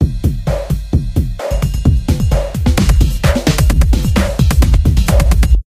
street song sound loop sample
hop, disko, beat, lied, dance, RB, Dj, loop, sample, sound, hip, rap, song